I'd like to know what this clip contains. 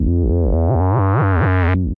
A Revved up Tech Sound
bass, dance, pad